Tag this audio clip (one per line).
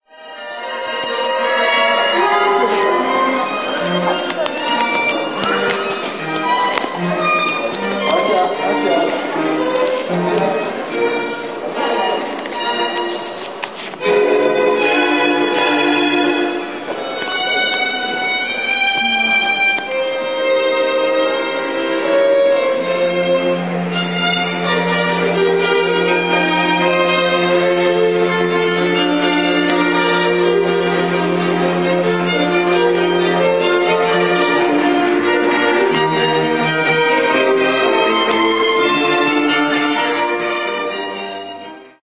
concert
poland
functional-art-museum
museum
poznan
visiting
field-recording
music